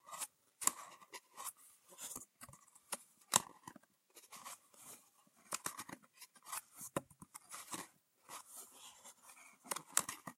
Open, closing cardboard
Thank you for the effort.
cardboard, closing, Open